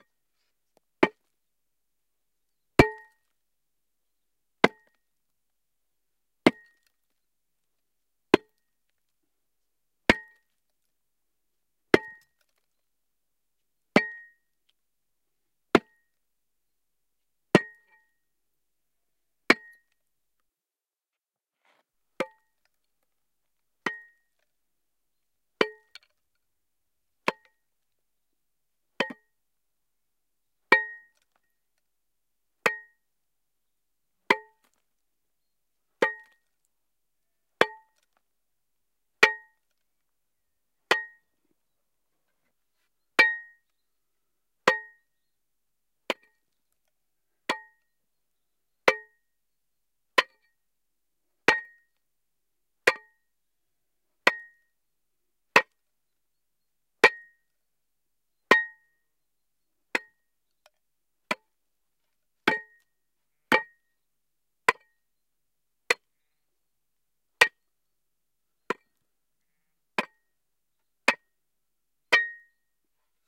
Pickaxe Striking Rock
A dual mono recording of a pickaxe striking a rock which is lying on soil, after 20s it strikes granite bedrock.. Rode NTG-2 > FEL battery pre-amp > Zoom H2 line in
dry, tool-steel, pickaxe, field-recording, rock, digging, mono, pickax, bedrock, granite